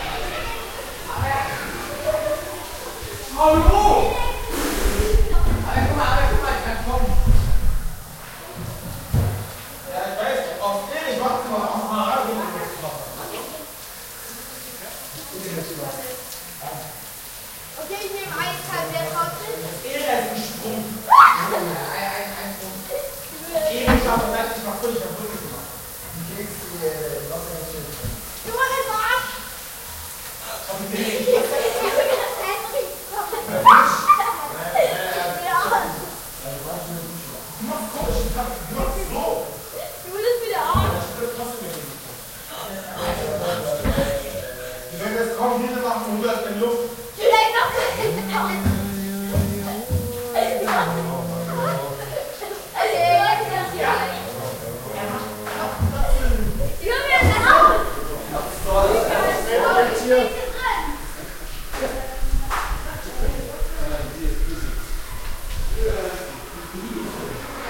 At the Swim Center, in the showers
Im Schwimmbad, in der Herrendusche
Dans une piscine intérieure, dans la douche
In una piscina coperta, sotto la docchia
En una piscina cubertina, bajo la ducha